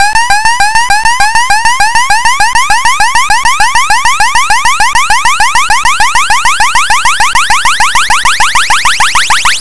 8-bit rising filter.
arcade, 8-bit, video-game